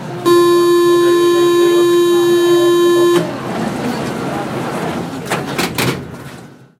Paris Metro doors closing
The buzzing sound signalling the doors on the Paris Metro train are about to close, followed by the sound of the doors closing.
Similar:
A trip on the Metro
Metro pickpocket warning
doors-closing, announcement, doors, beware, metro, french, field-recording, english, france, underground, train, alarm, warning, trip, buzz, paris